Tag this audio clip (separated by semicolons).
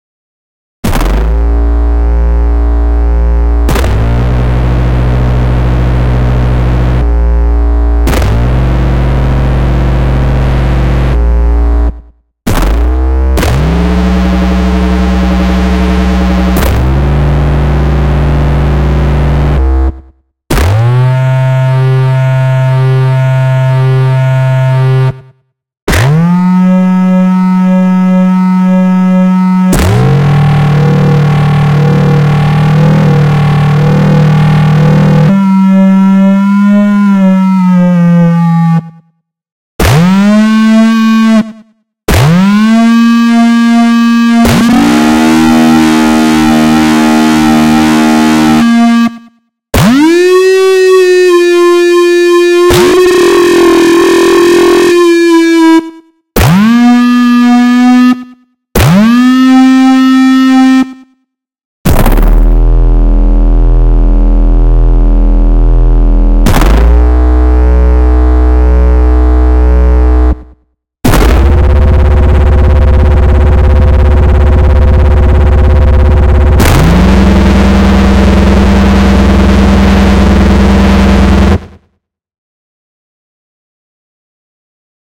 distortion; sound; synth